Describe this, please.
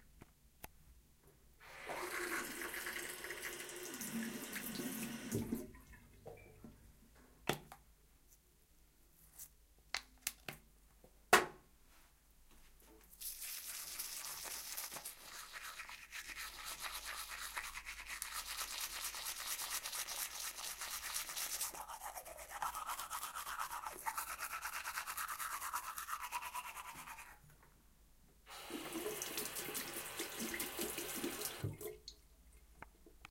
We will use this sounds to create a sound postcard.
barcelona, doctor-puigvert, sonicsnaps, sonsdebarcelona, spain